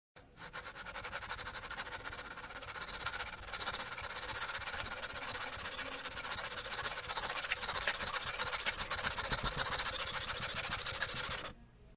Foley that sounds like scratches on wood.